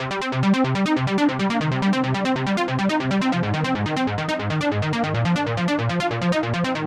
Bassline for techno!
140-bpm, synth, hard, flange, distorted, techno, pad, beat, sequence, phase, bass, trance, progression, distortion, strings, melody